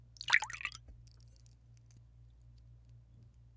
Pour 1 Shot FF315
Short pour of liquid into empty glass, clinking glass
glass, liquid, pour, short